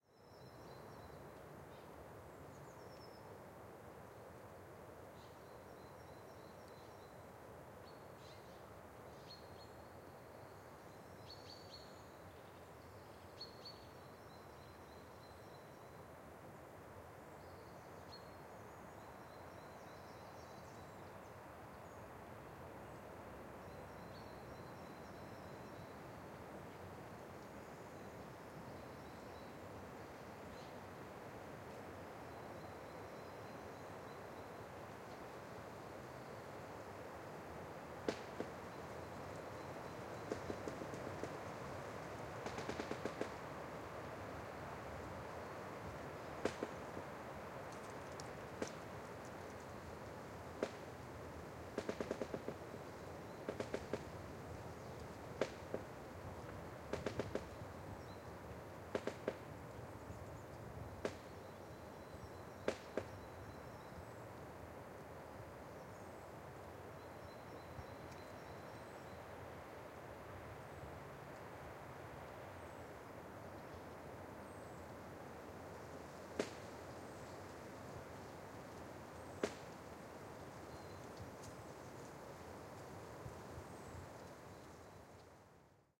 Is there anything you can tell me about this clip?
FOREST Creaking of trees in the wind
"Bois de L'Ermitage", a forest surrounding the Villers Abbey,Villers La Ville, Belgium.
Recorded the 21st of february of 2014, at 12pm.
2x Apex 180 ORTF - Fostex Fr2le